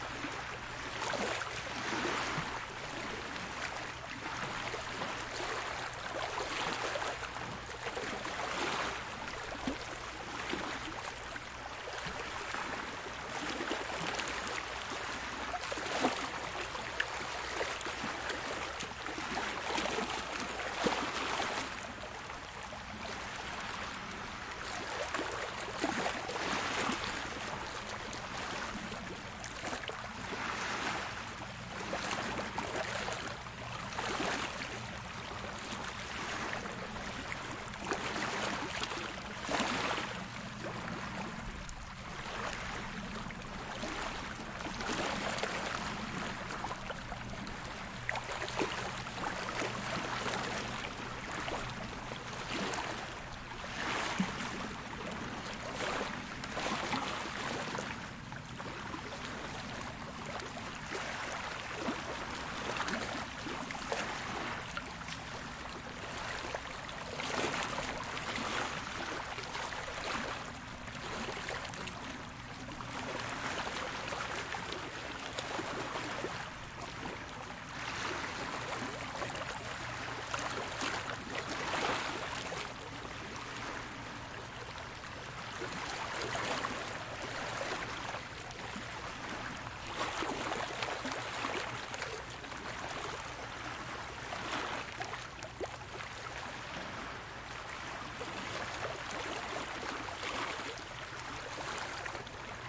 BGSaSc Water Beach Ocean Waves Constant Splashing Rocks Many Small Waves Greece 16
Water Beach Ocean Waves Constant Splashing Rocks Many Small Waves Greece
Recorded with KM84 in XY on Zoom H6
Small, Constant, Many, Ocean, Rocks, Beach, Greece, Waves, Splashing, Water